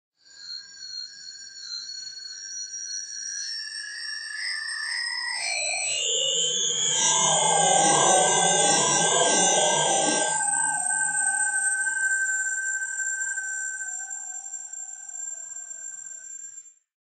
Tone Shift
Swelling/morphing bright metallic sound. "Swirling" character with vocal-like moving lower formants
bright, voice, swirl, metallic, swell